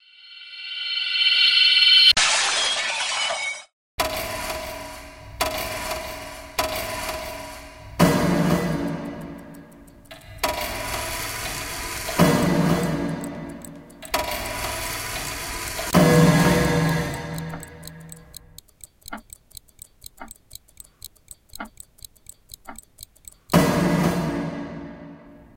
This is meant to represent "stopped time starting to move again". It's rough around the edges but with better timing and editing it can make wonders.
I unfortunately don't have the sources from back then.